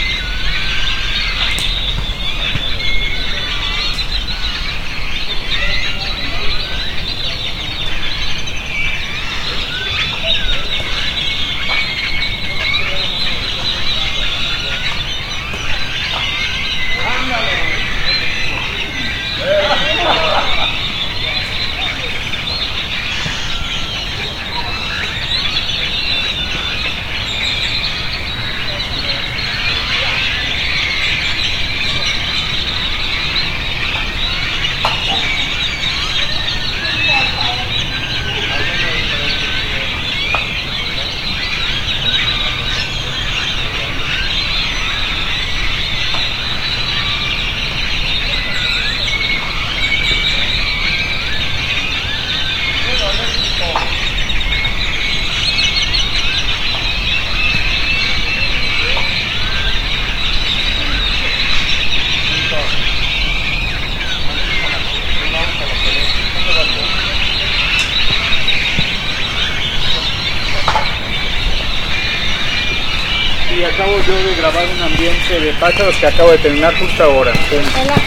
pajaros en la zona del bosque de chapultepec cantando al ocaso
birds in the forest area singing at sunset
field-recording, forest, birdsong, nature, bird, birds